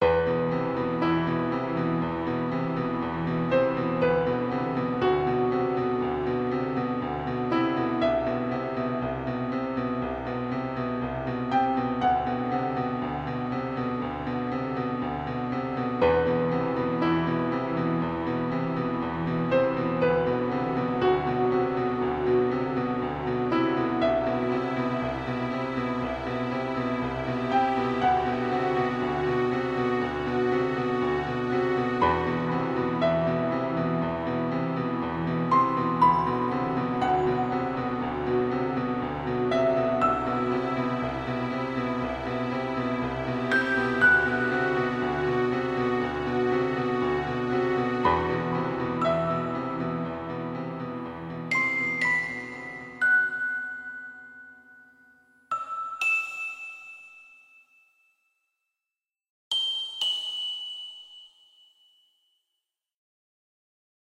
Awesome Emotional Piano
This is an piano song I made this morning...ON ACCIDENT! It was so awesome that I decided to put to other instruments like strings. It is just so emotional and, just for heads up, you might cry. I made it on Mix Craft.
awesome, dramatic, emotional, film, movie, piano, sad, strings, tear-jerking